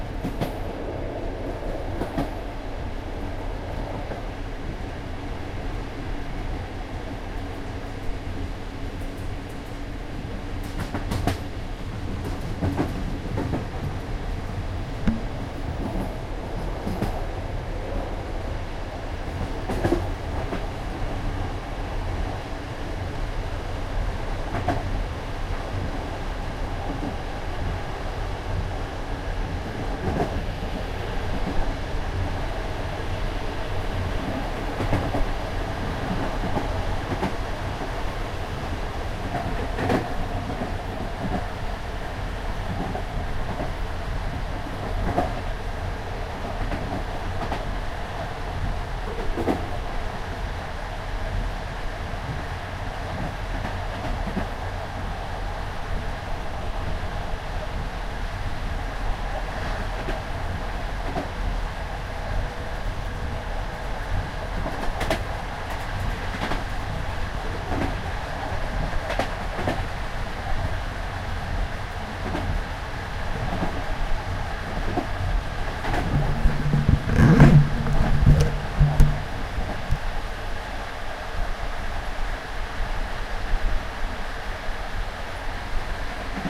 inside a train
train, passage, noise, atmosphere, background-sound, background, ambient, field-recording, ambience